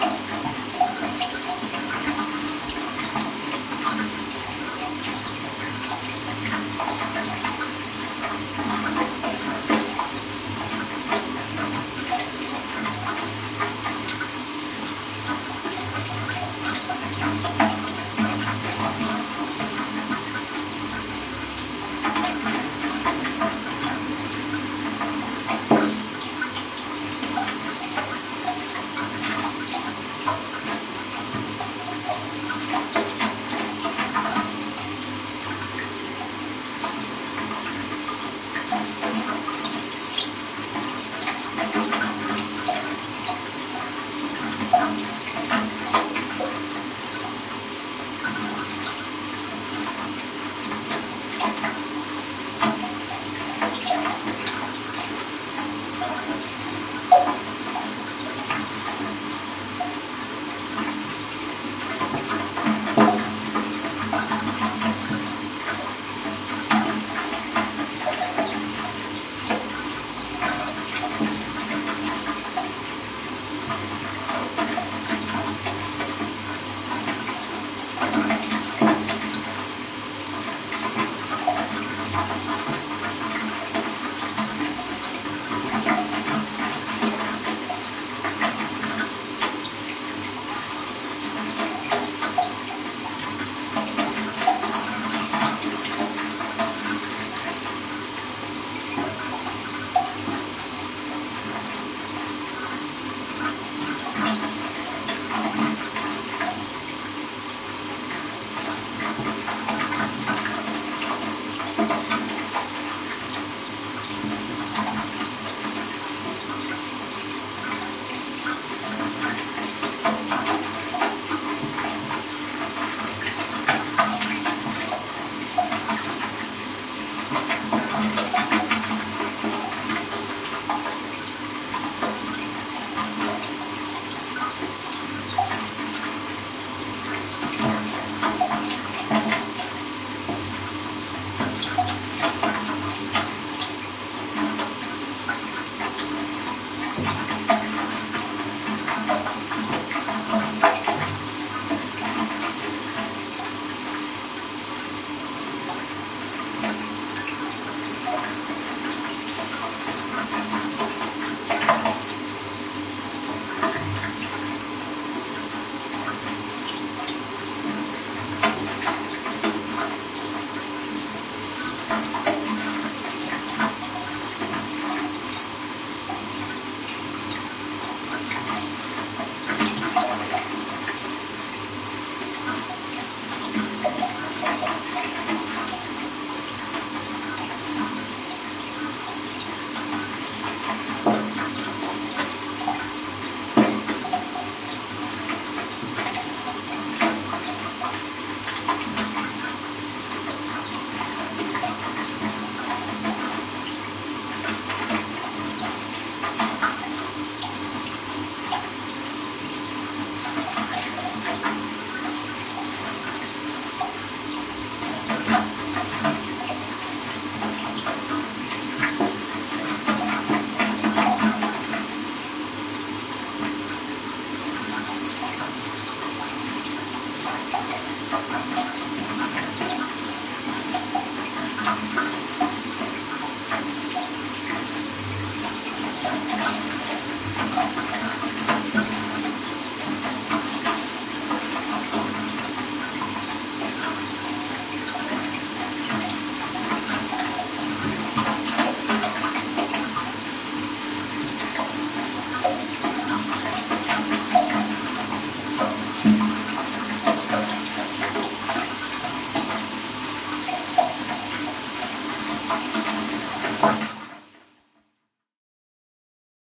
A broken flush in a Bathroom
date: 2009, 24th Dec.
time: 09:00 PM
place: indoor, my house (Palermo, Italy)
description: continuous and constant sound of a broken flush in my house
indoor, bathroom, broken-flush, flush